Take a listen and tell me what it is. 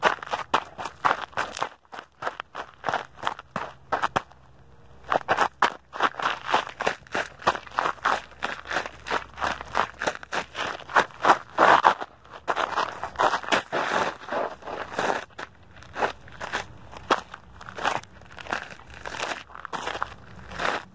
tlf-walking running gravel 02
Running on gravel
nature, outdoors, birdsong, gravel, singing, running, field-recording, ambience, bird, ambient, birds, trail